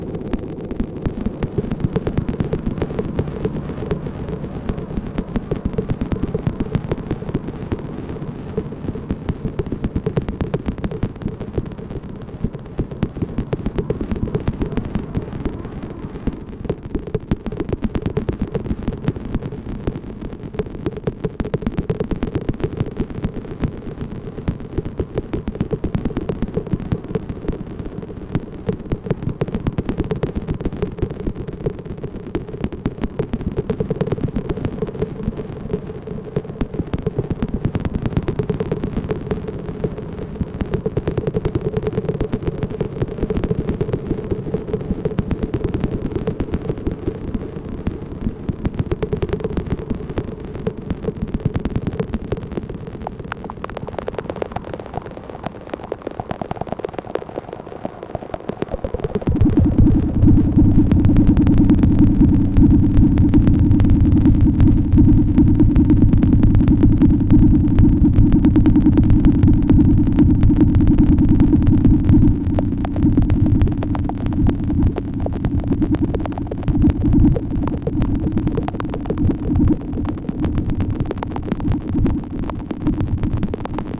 synthetic, cricket-like sounds/atmo made with my reaktor-ensemble "RmCricket"